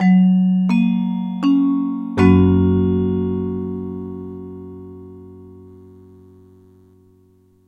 Increasing intro for an announcement with chord in the end. Recorded with Yamaha PF-1000 and Zoom H5, edited with Audacity.
airport, announcement, automated, beginning, gong, intro, platform, railway, station, tannoy, train